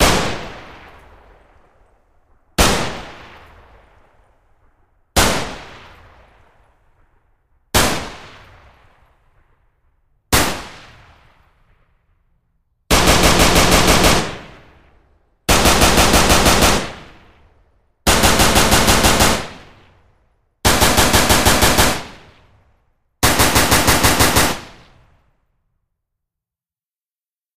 Comments & Criticisms welcome.
Enjoy.